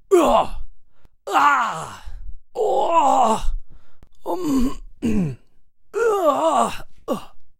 Various male grunts sounds recorded using RODE NT1 Microphone
man, male, grunts, voice, human